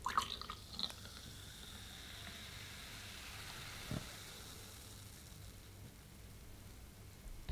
fizzy water pour 002
Sparkling water being poured into a glass.
glass, fizzy, pour, water, sparkling, fizz